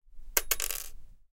Dropping, Metal Pin on Wood, B
A sound of a metal pin being dropped on a wooden desk from about 50cm. I recorded this for a university short film project where I had to add sound and music. You can watch the short where I used this sound over here ("Sebastian's Voodoo").
An example of how you might credit is by putting this in the description/credits:
The sound was recorded using a "H6 (XY Capsule) Zoom recorder" on 11th December 2017.
fall, dropping, drop, wooden, pin, wood, hit, desk, falling